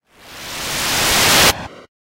a user interface sound for a game
woosh, user-interface, click, videogam, swish, game